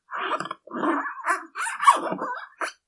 Synthetic Noise - 12
Made using a ridged piece of plastic.
synthetic, digital, synth, electronic, metal, strange, industrial, zip, electric, sci-fi, effect, machine, robot, animal